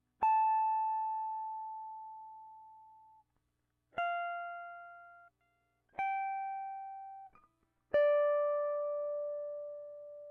Electric Guitar Single Notes Direct into Avalon M5
Telecaster recorded directly through an Avalon M5 into an Apogee Duet
preamp, studio, duet, electric-guitar, avalon, m5